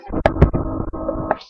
Mic Blocked15
You guys are probably wondering why I haven't been posting many sounds for the last month well number one so I can upload a lot of sounds at once and two I have been pretty busy with track and play practice. So now here is a bunch of sounds that were created by me either covering up or bumping my mic which I hope you will enjoy.
Field-Recording, Foley